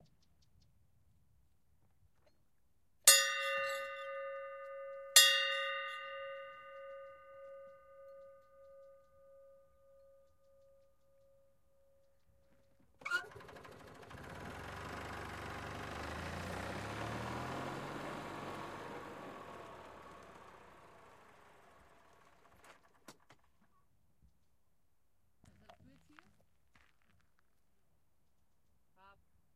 160903 Golf Bell Golspie
Bell rings twice, then golf-buggy starts and drives some meters to the next green. The bell hangs on the beautiful golfcours of Golspie in northern Scotland leaving the sixth green to signalize the next flight to proceed.
bells, field-recording, ships-bells, sports